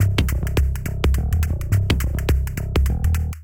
I just added a bass line. 140 bpm. 2 measures.
bass, rhythmaker